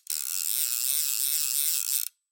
Angel Fly Fish Reel Fast Wind 1
Hardy Angel Fly Fishing Reel winding in line fast
reel, fly, winding, pulling, retrieve, turning, clicking, fishing